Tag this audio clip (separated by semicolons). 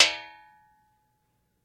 Metal; tink; Impact; bang; Hit